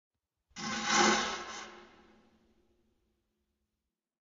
expressional fart
Real farts! Some with natural reverb.
nice, horror, flatulation, flatulate, flatulence, gas, rectum, rectal, halloween, flatus, farts, fart